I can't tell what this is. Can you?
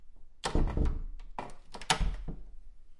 A bedroom door closing
Door, foley